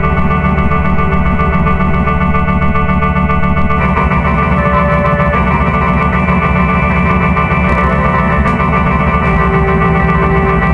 some experimental ambient and very dense sound in pure data.
glitch, puredata